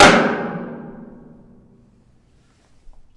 One of a pack of sounds, recorded in an abandoned industrial complex.
Recorded with a Zoom H2.